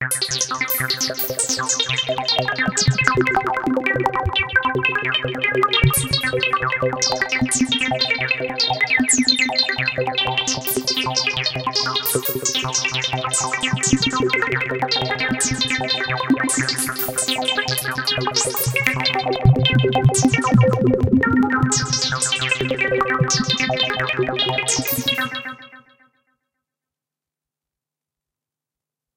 weird science
i made it with analog gear.